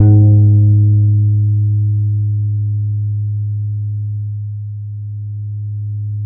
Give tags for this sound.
bass
velocity
multisample
1-shot

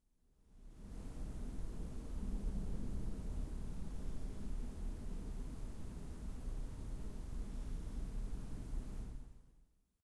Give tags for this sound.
bed
rain
field-recording
body
human
thunder

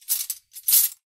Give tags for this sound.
cutlery
jingle
metal

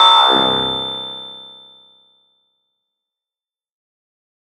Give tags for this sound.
110
acid
blip
bounce
bpm
club
dance
dark
dub-step
effect
electro
electronic
glitch
glitch-hop
hardcore
house
lead
noise
porn-core
processed
random
rave
resonance
sci-fi
sound
synth
synthesizer
techno
trance

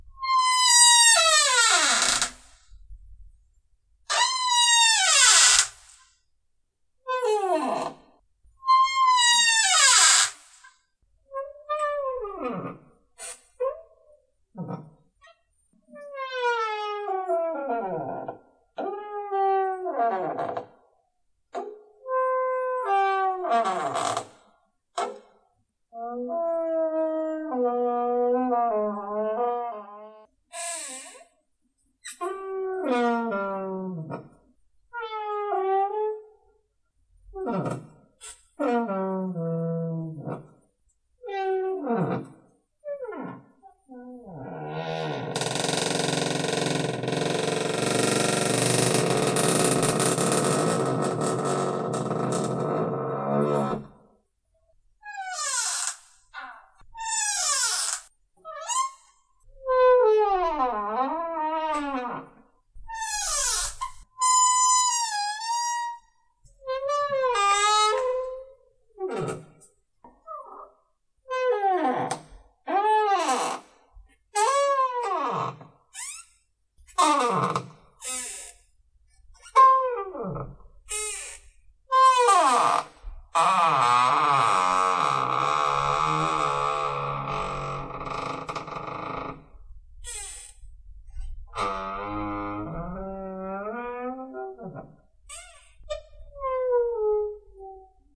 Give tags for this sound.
closing
creaking
door
house-recording
opening
squeaking
wooden

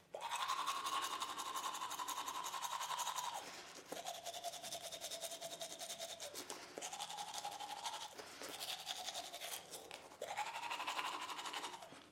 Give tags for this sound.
bathroom
teeth
brushing
environmental-sounds-research

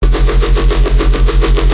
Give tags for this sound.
Bass
FLStudio12

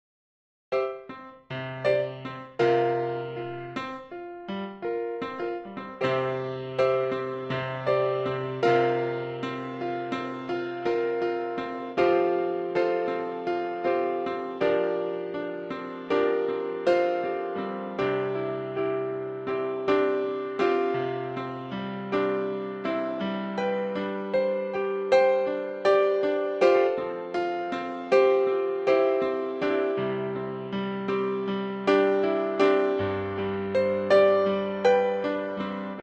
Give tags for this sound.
80
blues
Do
HearHear
Piano
rythm

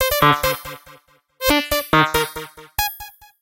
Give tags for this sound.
idm
lead
loop

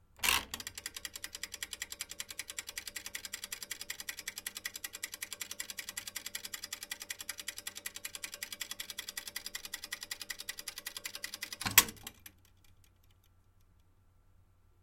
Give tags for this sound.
mechanical-timer
washing-machine

